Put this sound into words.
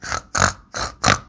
Small piggy sound.
Recorded by simple mobile phone.
animal, farm, pig, piggy